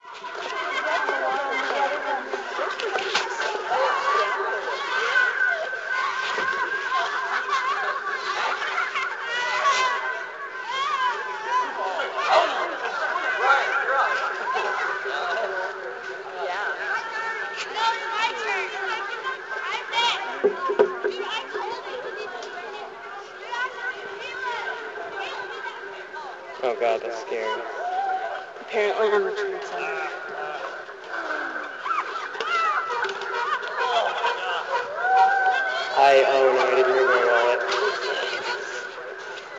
Children Laughing
this was a trip to a playground, like the boards of canada kids sort of. Enjoi
ambient, children, seattle, recorded, field